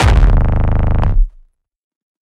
Hardstyke Kick 11
bassdrum, distorted-kick, distrotion, Hardcore, Hardcore-Kick, Hardstyle, Hardstyle-Kick, Kick, layered-kick, Rawstyle, Rawstyle-Kick